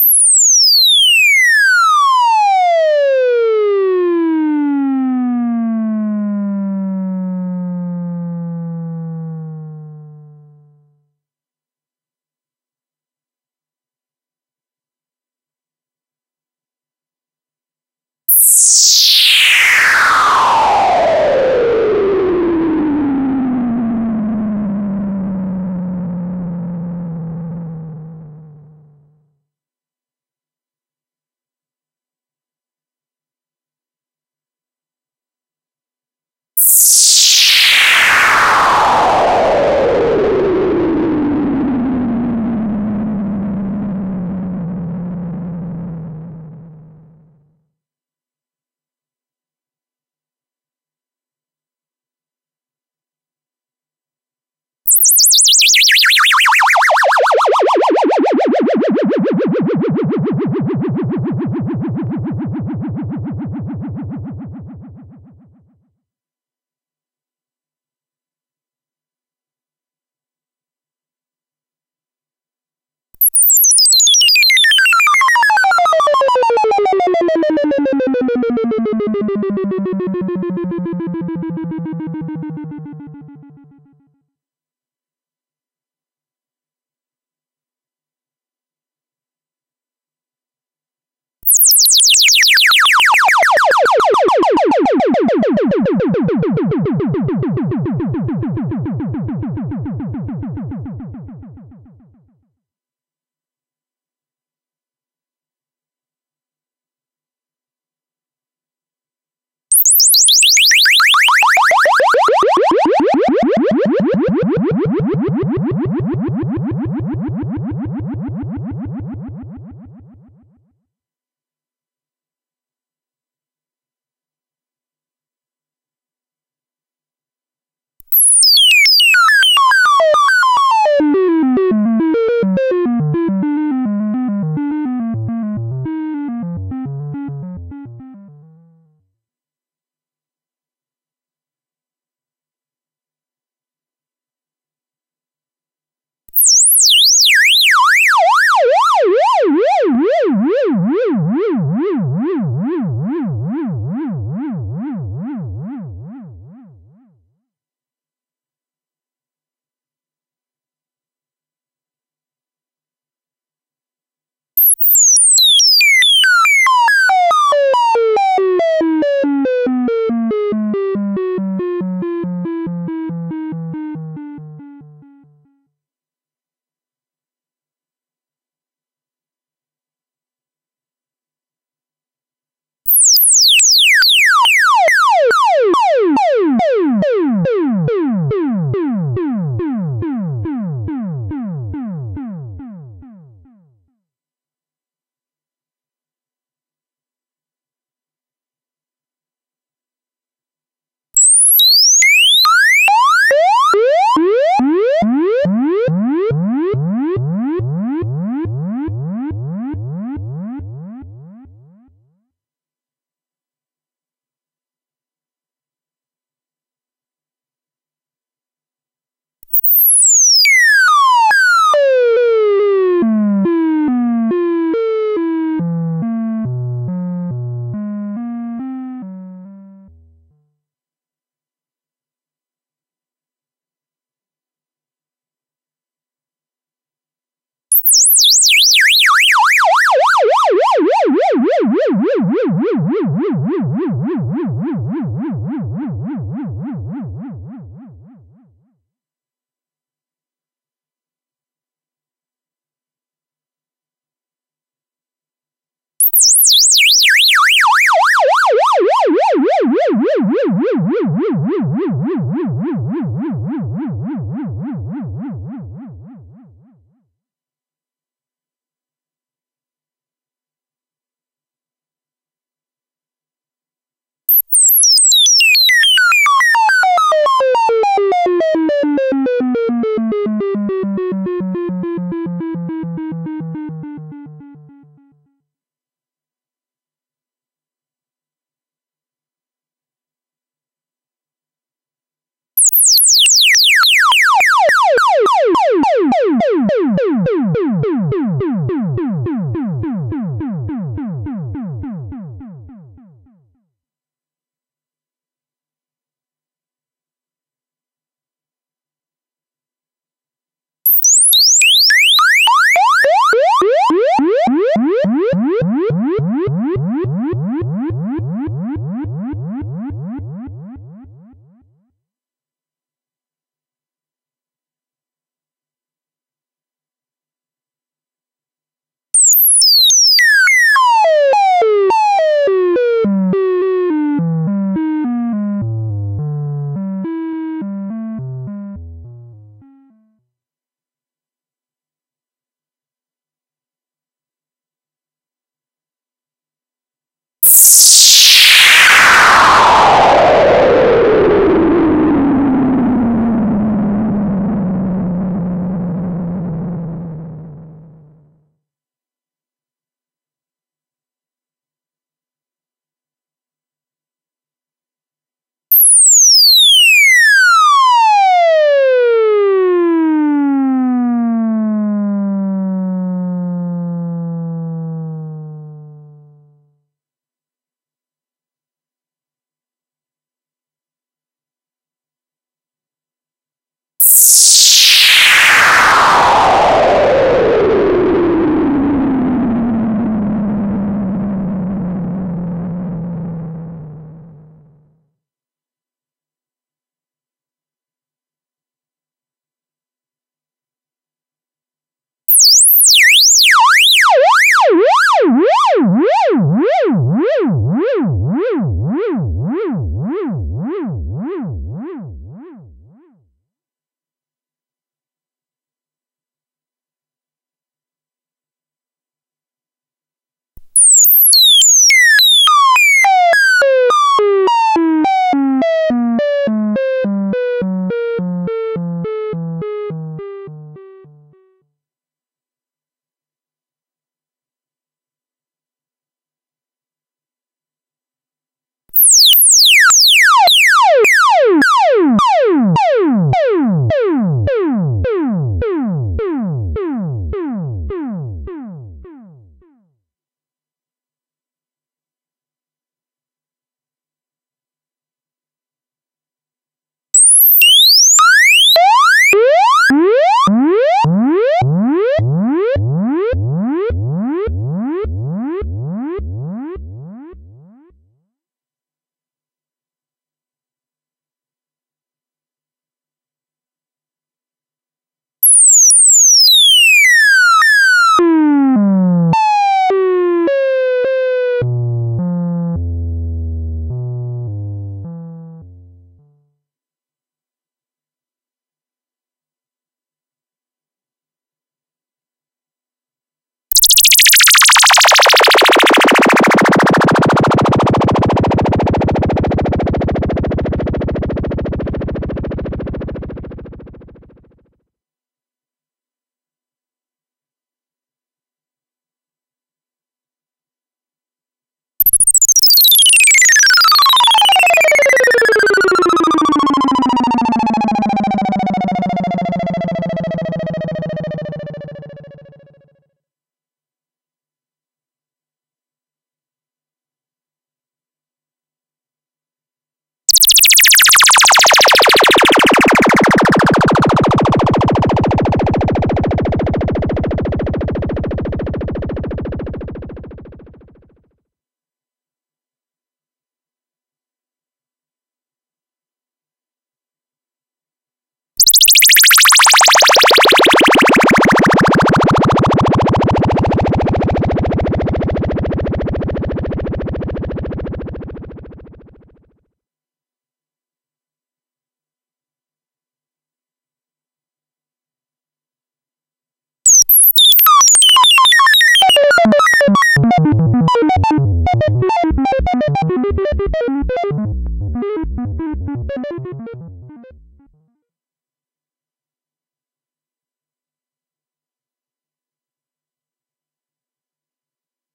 These are some bleeps and sweeps I made with my Moog Little Phatty Stage II synthesizer.
Each single sample in this file have different modulation settings.
I used misc LFO waves and LFO clock division settings (1/16, 1/8t, 1/4, 1/32).
The LFO master tempo is 105 BPM.
There is also a reversed version of this sample file which I've uploaded here also in the same sample pack